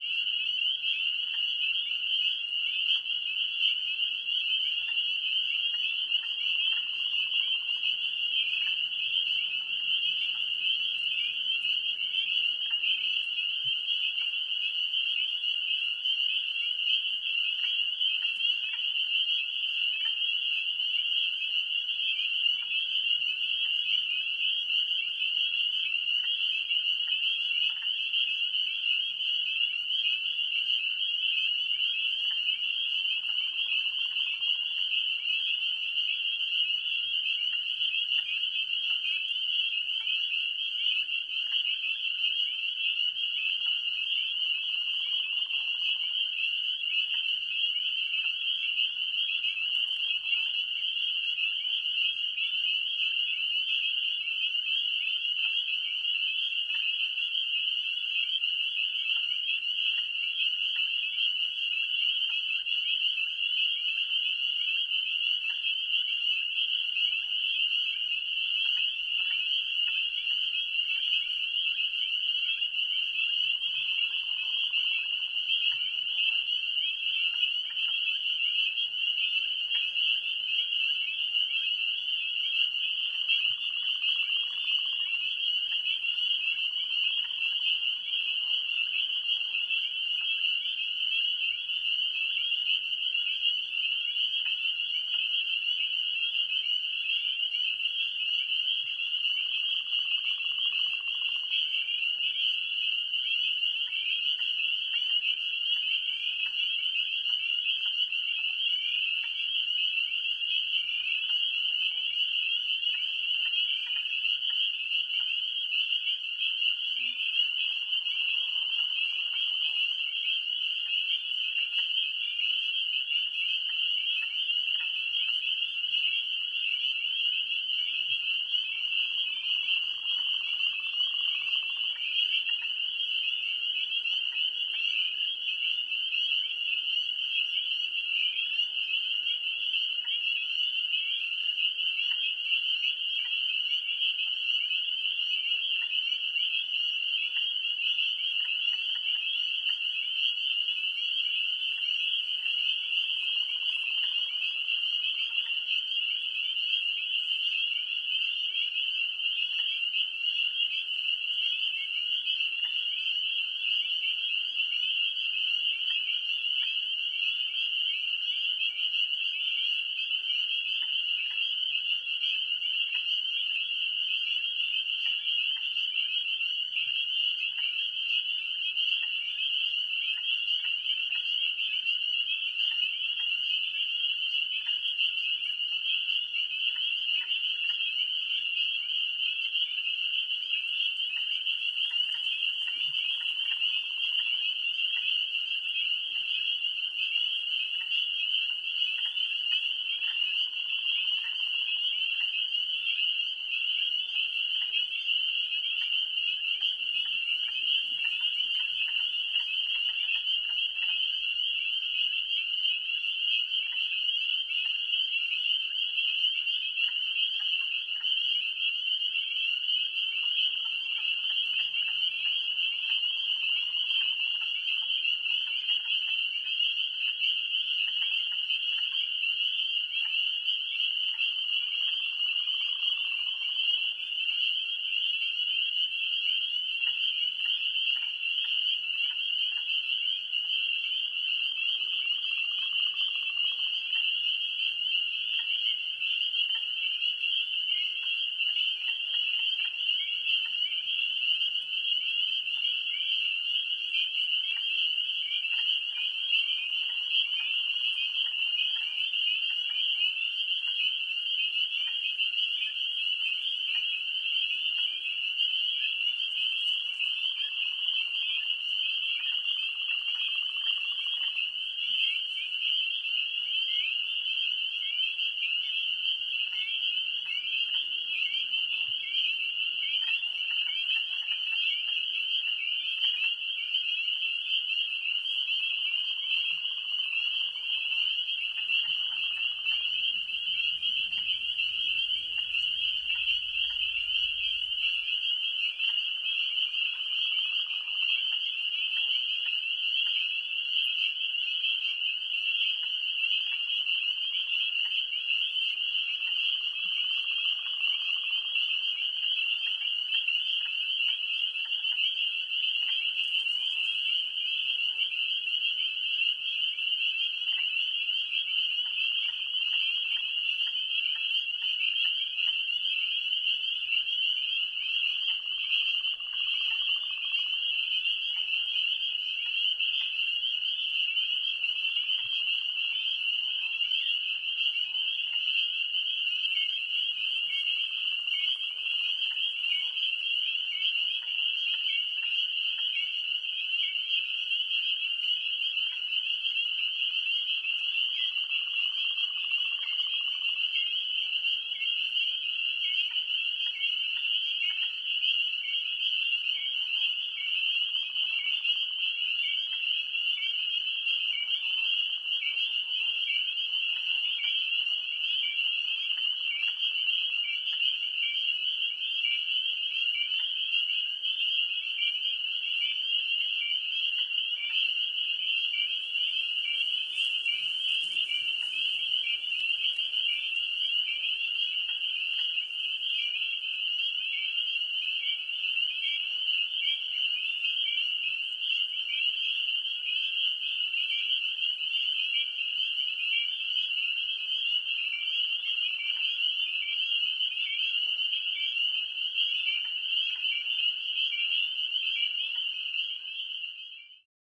EXT XY spring peepers quad 01
Closer perspective Predominantly Spring Peepers and other frogs, probably Boreal chorus frogs. Active spring wetland at dusk. This is a quad recording. XY is front pair (and file with same name but MS is back pair). Recorded with an H2 Zoom.
frog, field-recording, peepers, nature, spring, swamp, frogs, wetlands, night